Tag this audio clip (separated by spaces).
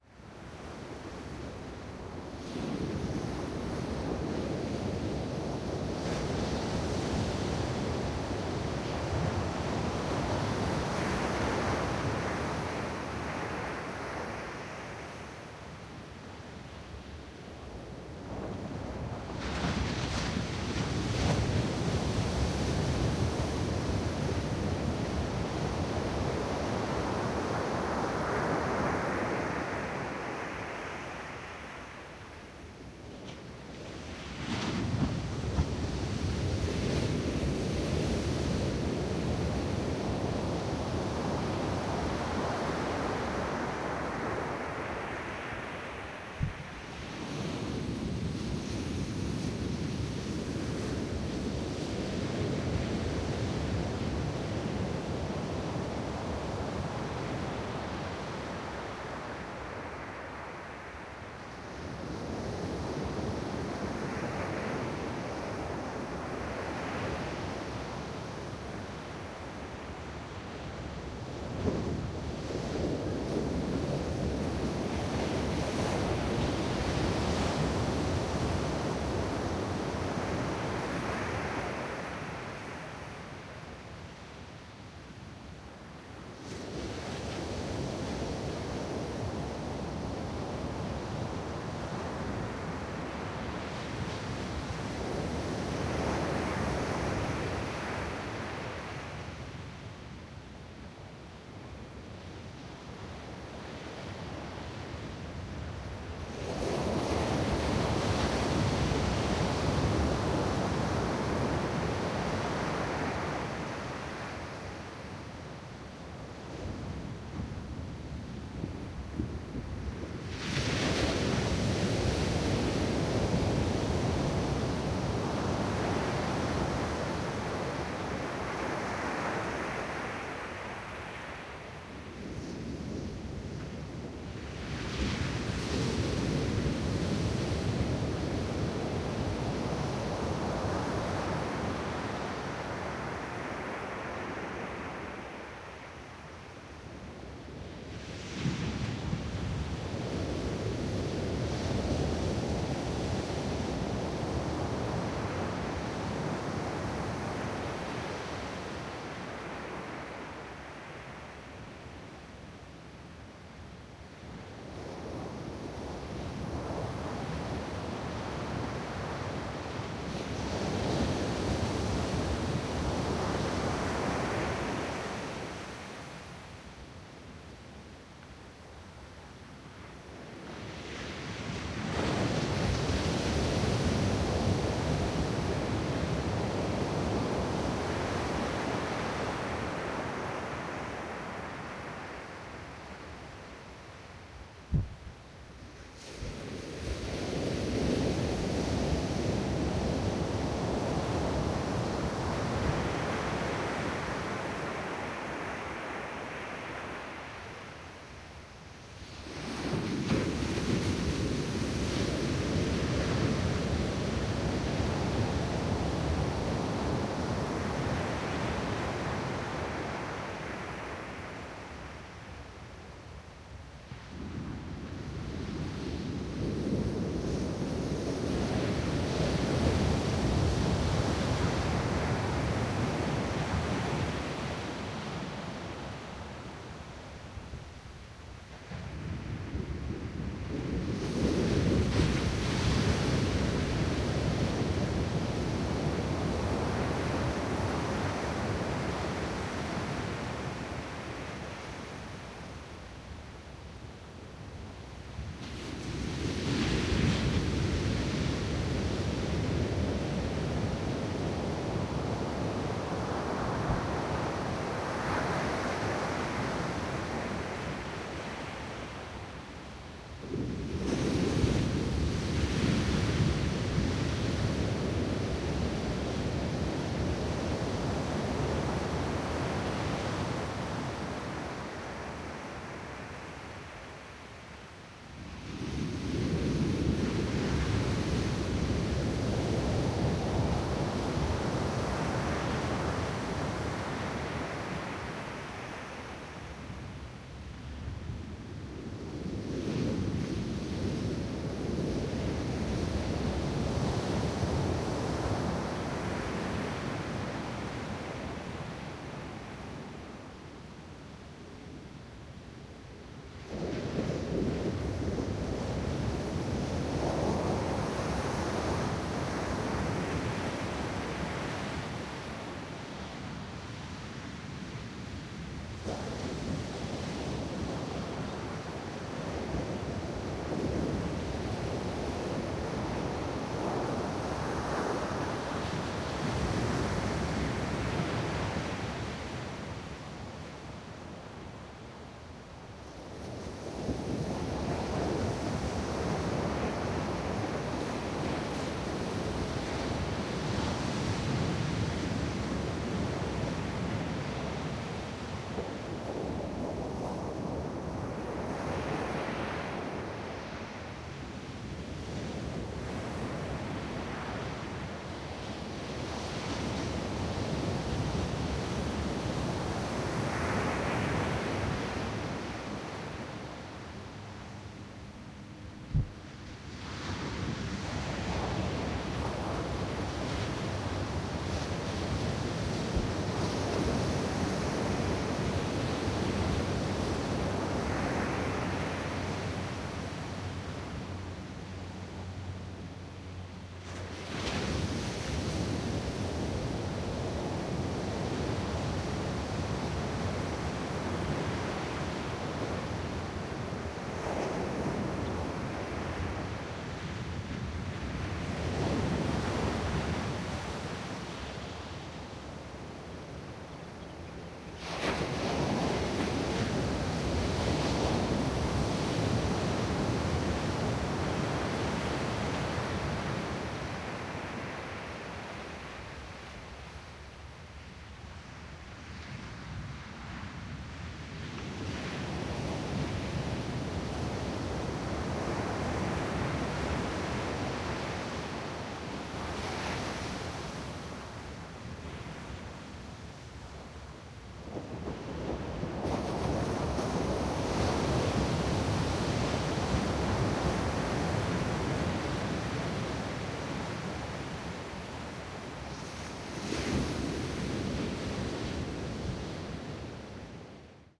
beach field-recording waves ocean water